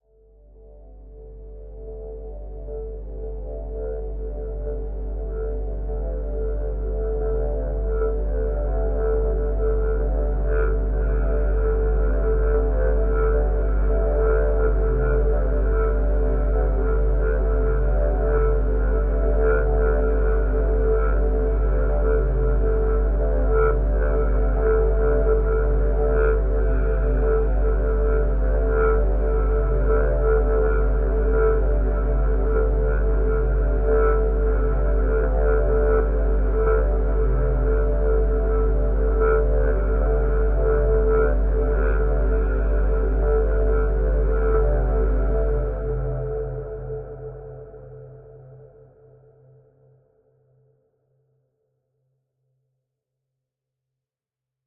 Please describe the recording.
Cinematic Ambiance Futuristic Background
Cinematic Futuristic Background atmo
Album: Cinematic Sounds
ambience, ambient, atmos, atmosphere, atmospheric, background, background-sound, drama, dramatic, future, futuristic, Gothic, intro, light, phantom, sci-fi, sound-design, soundscape, thrill, weird